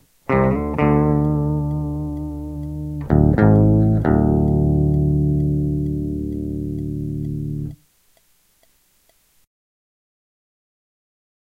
CLN GUIT FX 130BPM 3
These loops are not trimmed they are all clean guitar loops with an octive fx added at 130BPM 440 A With low E Dropped to D
REVEREND-BJ-MCBRIDE, 2-IN-THE-CHEST, DUST-BOWL-METAL-SHOW